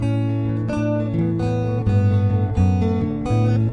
Gap1+Gap2
Guitar notes arpeggiation - Key A - Looped - Doubled
Guitar tuned one third down - Standard D formation.
Yamaha acoustic guitar heavy gauge strings.